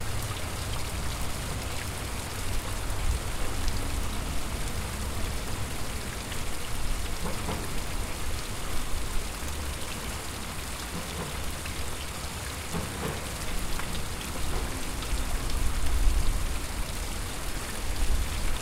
Waterfall from wastewater pipe on the riverside near Leningradsky bridge.
Recorded 2012-10-13.
XT-stereo
tube, sewage, city, pipe, water, flow, waterfall, water-pipe, drain